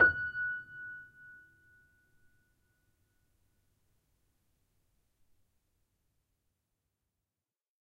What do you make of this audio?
upright choiseul piano multisample recorded using zoom H4n
choiseul, multisample, piano, upright